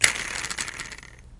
My first experimental attempt at creating impulse responses using a balloon and impact noises to create the initial impulse. Some are lofi and some are edited. I normalized them at less than 0db because I cringe when I see red on a digital meter... after reviewing the free impulse responses on the web I notice they all clip at 0db so you may want to normalize them. They were tested in SIR1 VST with various results. Plastic wheel on a toy scooter spinning, not an impulse but does some crazy stuff in a convolution plugin.
free, impulse, response